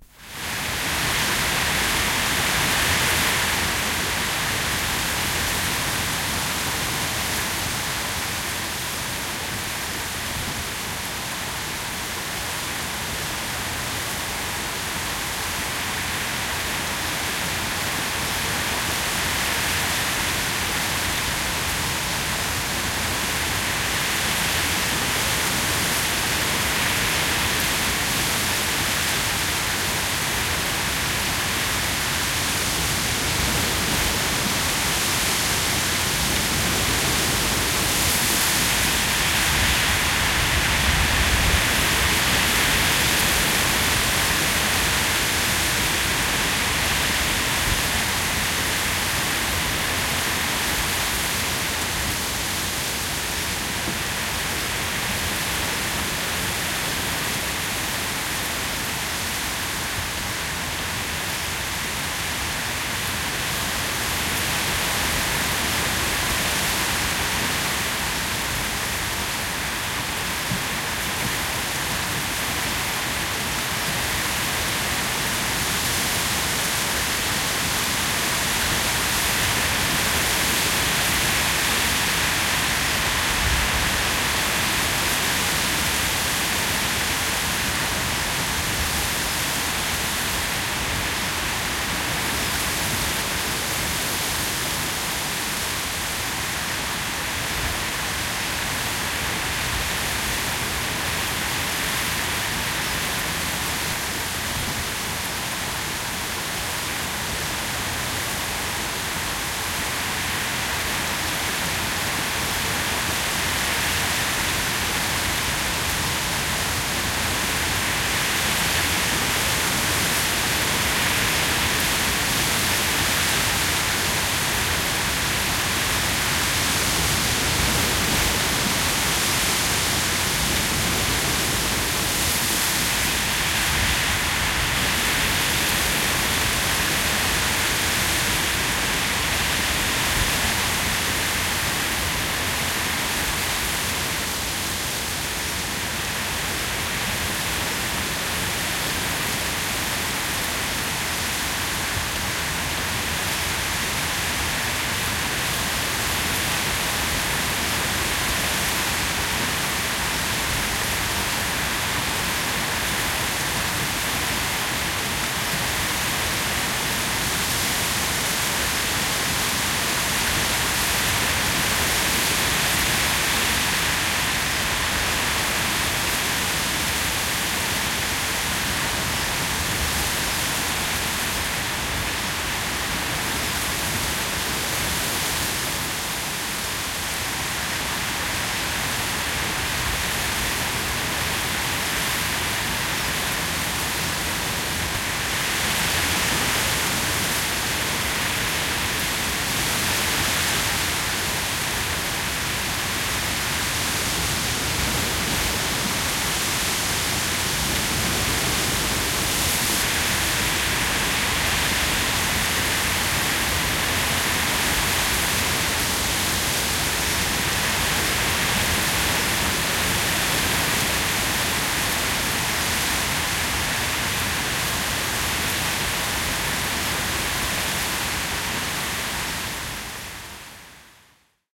Tuuli saaren puissa metsässä / Wind humming in the trees on an island, forest
Puuskainen tuuli suhisee lehtipuissa.
Paikka/Place: Suomi / Finland / Espoo
Aika/Date: 20.08.1984